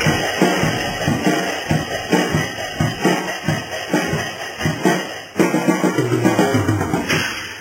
2nd grouping faster tempo drum beat same kid... 2 separate tempos or something...